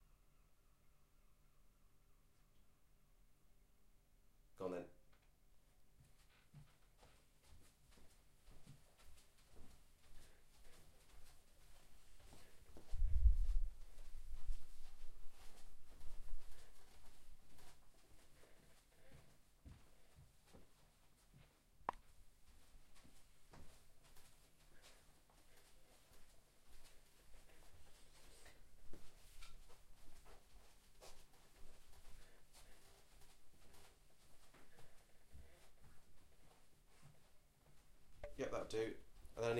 Footsteps carpet shoes towards and away
2 men walking into a carpeted room with shoes, around it and out again. Moderate speed. A tiny moment of wind noise at one point when they get close (sorry).
carpet, footsteps, indoors, walk